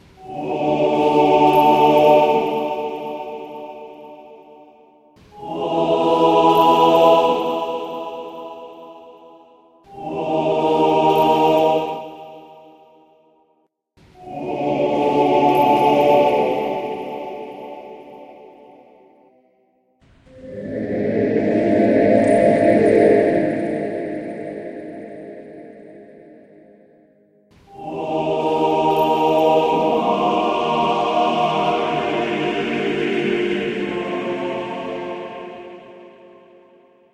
Recorded and edited my friend's wonderful choir.
•Credit as Patrick Corrà
•Buy me a coffee
angelic, cathedral, chant, church, epic, monk, sacred